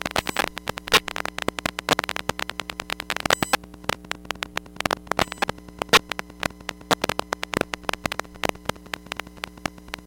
tablet standby loop
The sound of a tablet in standby mode (on but the screen is off). Recorded with an induction coil microphone.